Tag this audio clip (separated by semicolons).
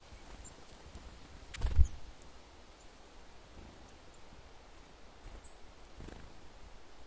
wing; bird; nature; field-recording; low-frequency; forest; wind; birds